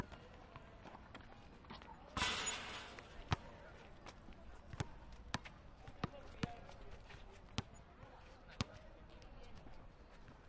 First you can listen the ball in the basket and next the bounce against the concrete. This was recorded in a park and because of this you can listen another people playing football and fronton in the background.